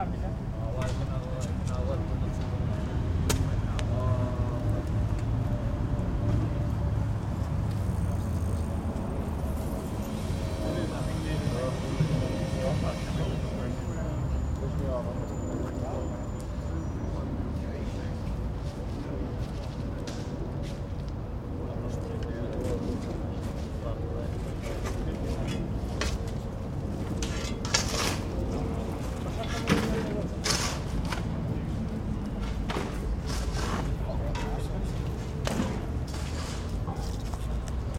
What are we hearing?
Building site
building, construction, machinery, shovel, works